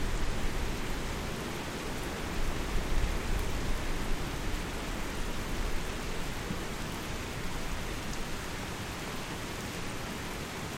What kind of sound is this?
Rain from my yard, recorded from my window
Recorded with Blue Yeti Mic